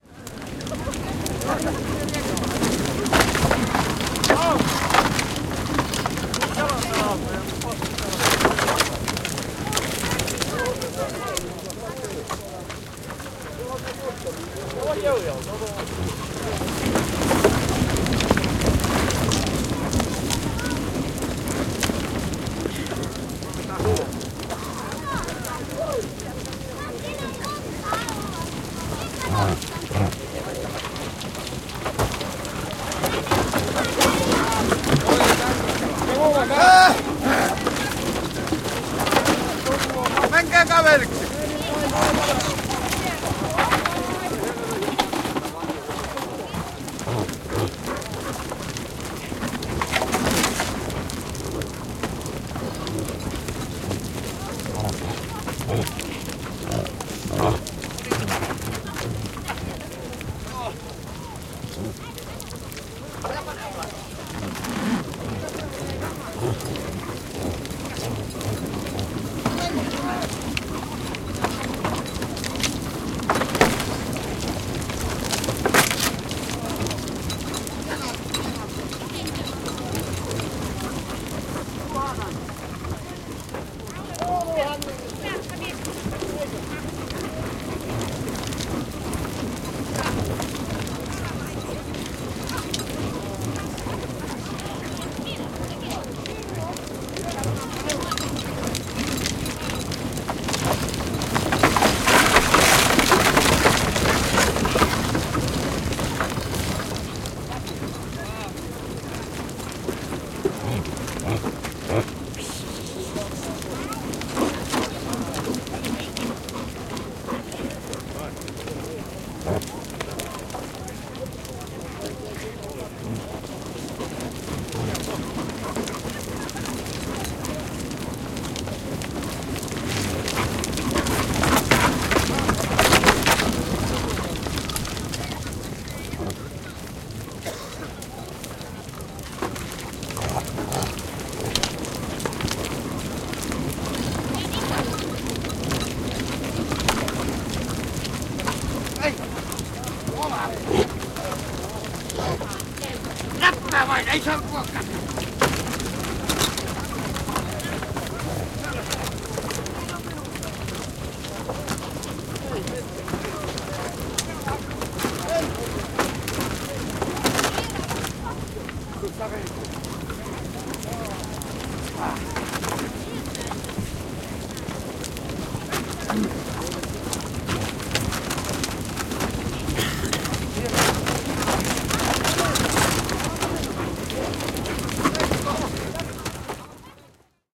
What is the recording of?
Lappi, poroerotus, porot kirnussa / Lapland, reindeer round-up, separation, big herd of reindeer in a fencing, churn, grunting, human voices
Iso lauma poroja kirnussa, porot liikkeessä, ääntelyä, kelloja, ihmisääniä.
Paikka/Place: Suomi / Finland / Inari, Hirvassalmi
Aika/Date: 13.01.1977